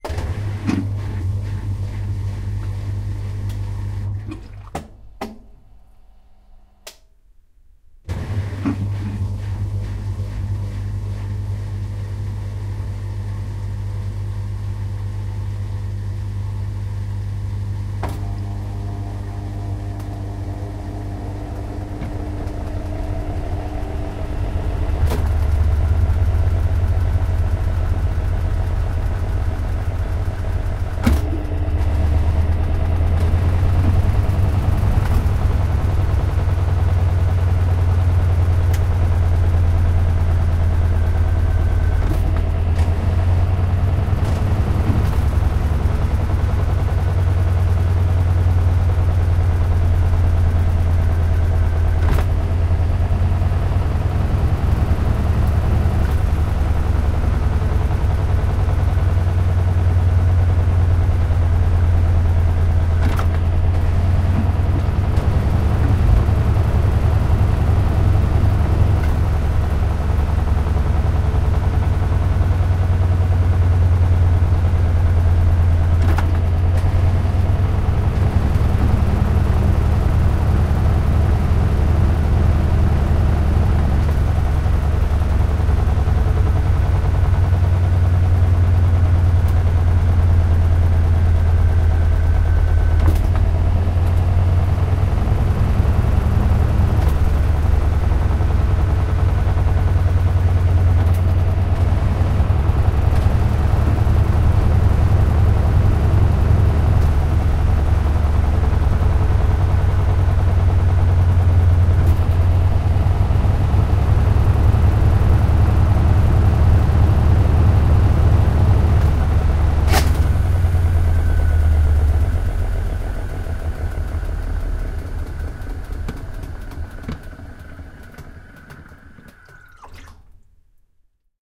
The sound of a washing machine going through an empty spin cycle.
Recorded with a Zoom H1.